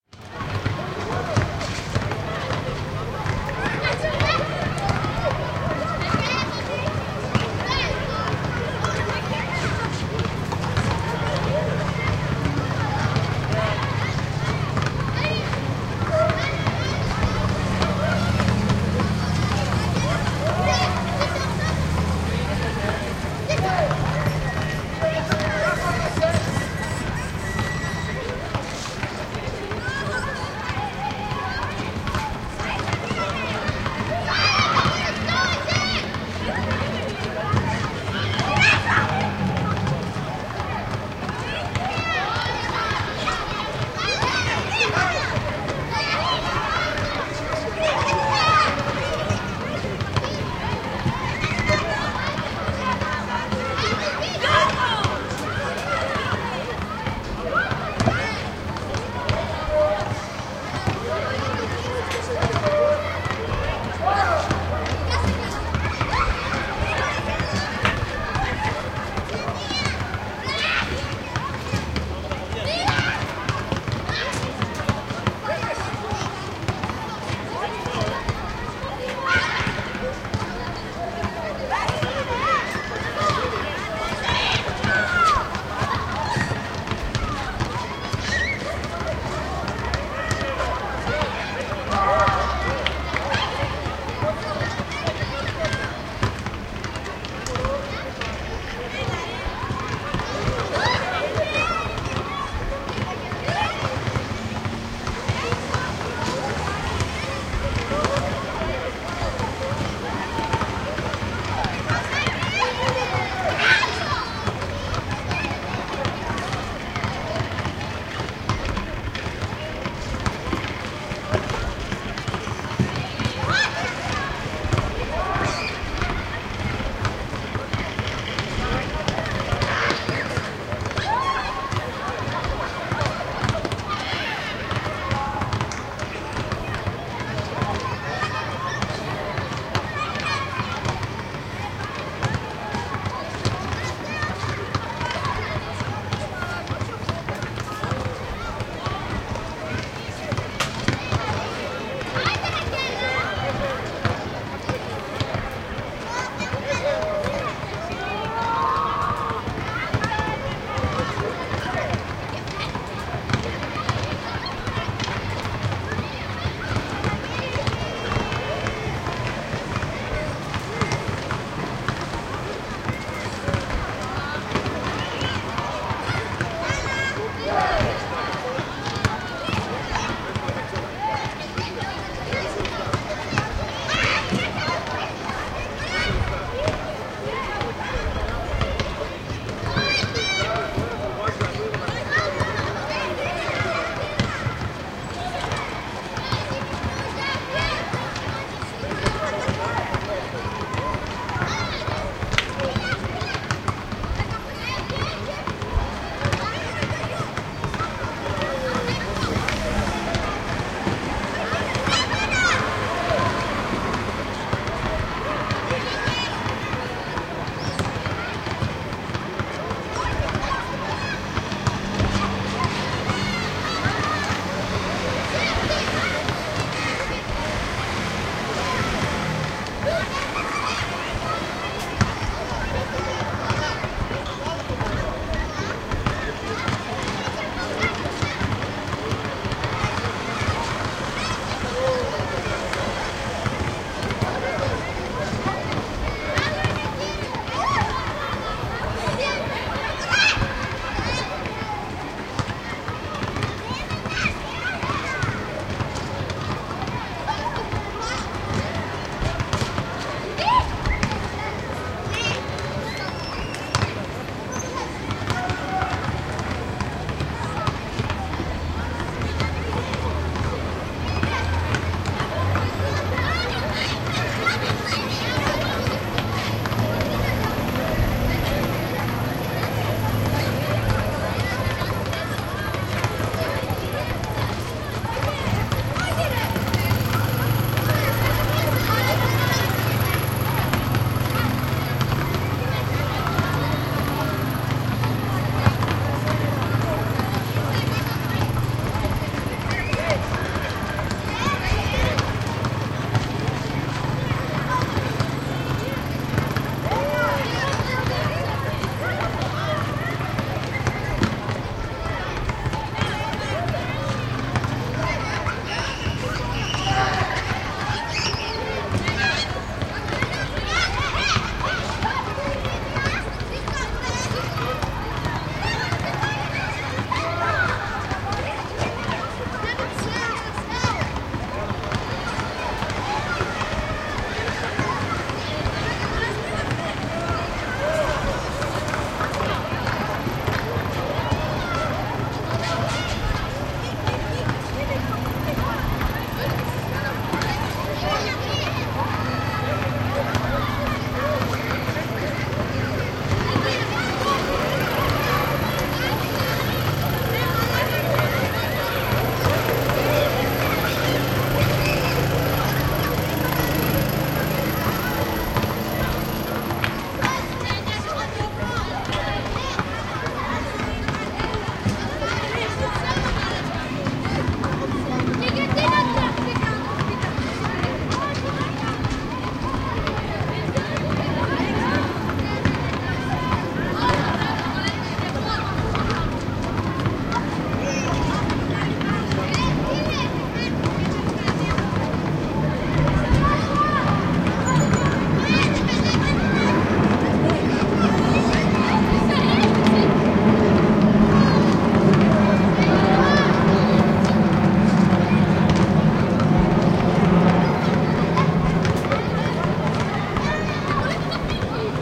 Schoolyard - (Evosmos - Salonika) 19:25 25.09.11
7:25 p.m. School yard. Kids while playing basket ball and other games. Many voices of dozens of children and sounds of the balls and the game. Hear the vehicles passing on the street. At the final section of the recording is heard the sound of an airplane flying across the sky. I used the ZOOM Handy Recorder H2 with internal microphones. I made some corrections in Adobe Audition 3.0. Enjoy!